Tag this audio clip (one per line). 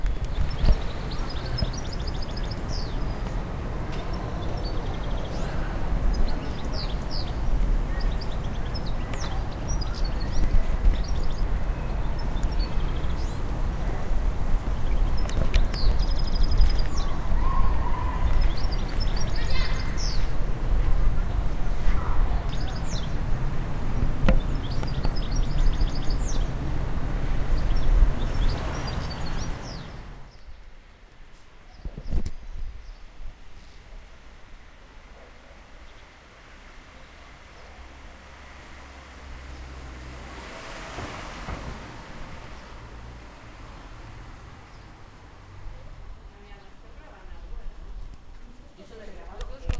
bird
cadernera
deltasona
field-recording
goldfinch
ocell
park
pine